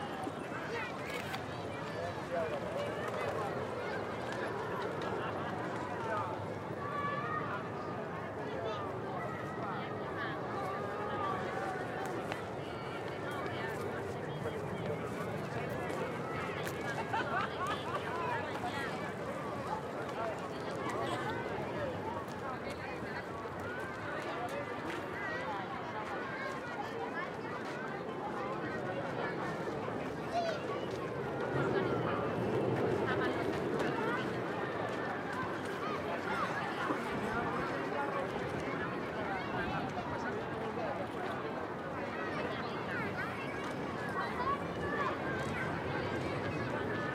Ambiente - parque infantil media actividad 2
Environment from a playground
MONO reccorded with Sennheiser 416 and Fostex FR2
playground, childs